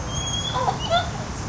A White-eared Titi Monkey calling, with cicadas in the background. Recorded with a Zoom H2.